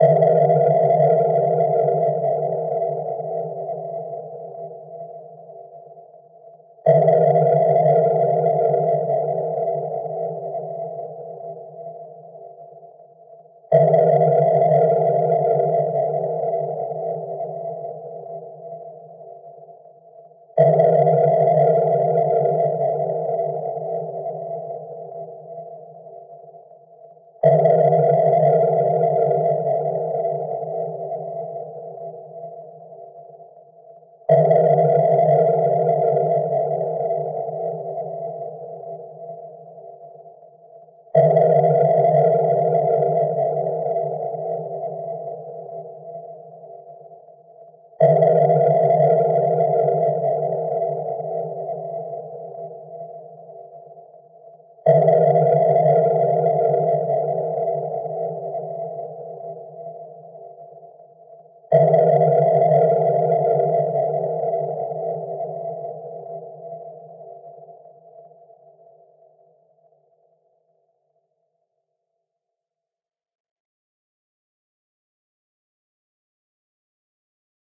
Slow higher-pitch sonar pings with a long decay.
Made in FL Studio 10